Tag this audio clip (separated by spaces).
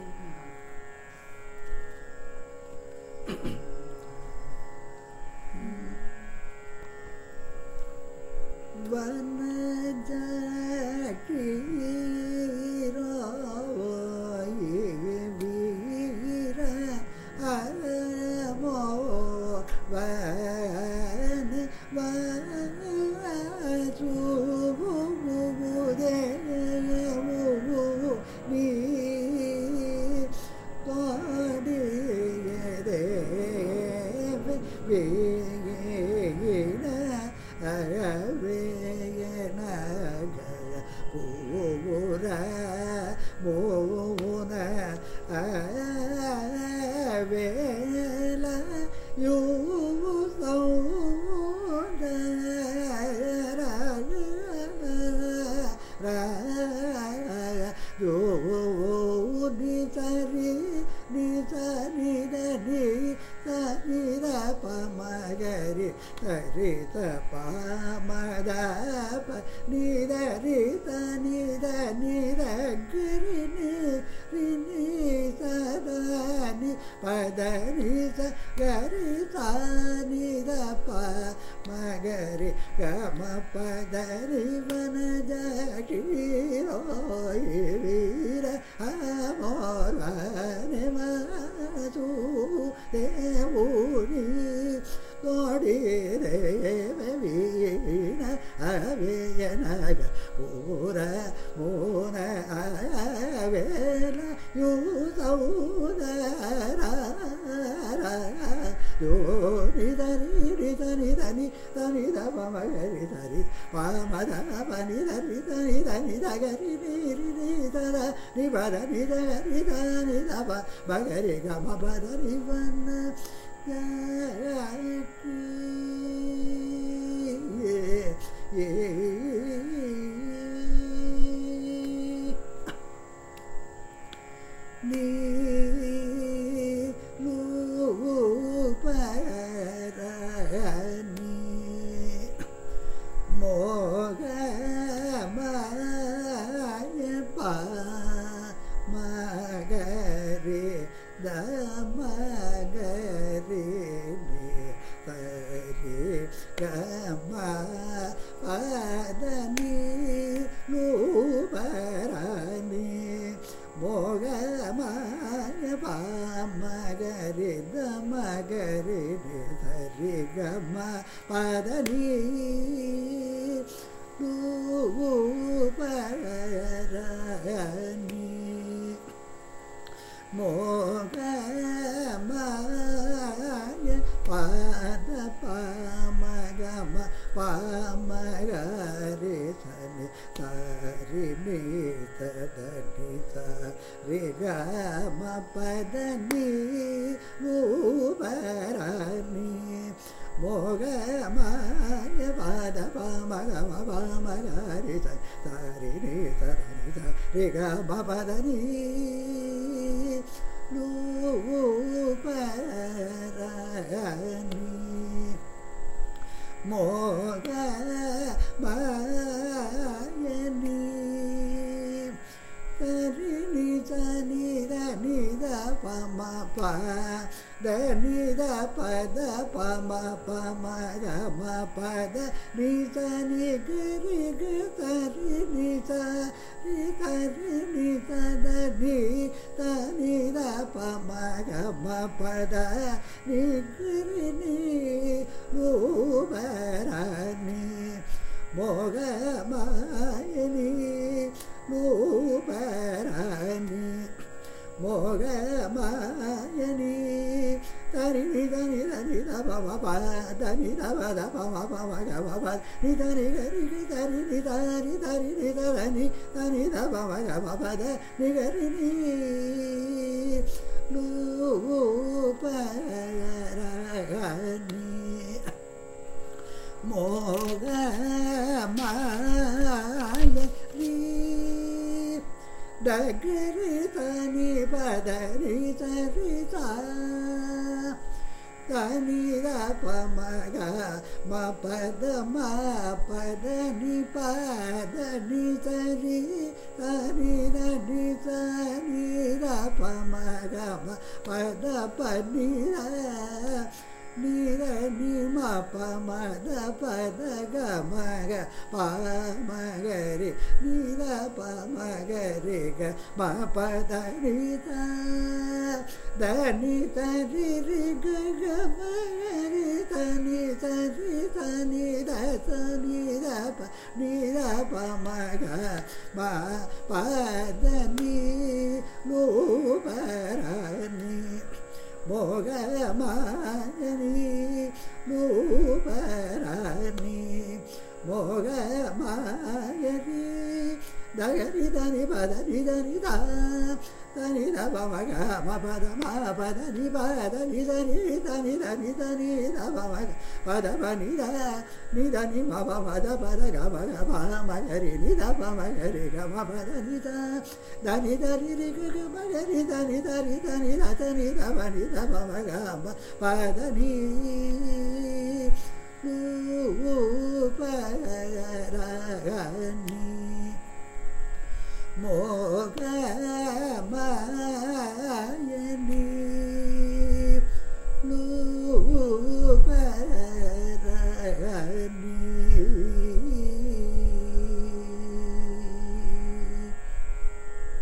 iit-madras carnatic-varnam-dataset varnam music carnatic compmusic